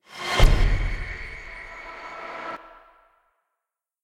Horror Stinger Jump Scare Sound FX - created by layering various field recordings and foley sounds and processing them.
Sound Design for Horror